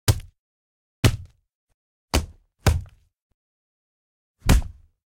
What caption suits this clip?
5 Bat Hits
5 foley hits, wooden bat-on-zombie
foley, meat, hit, dead-season, bat, percussion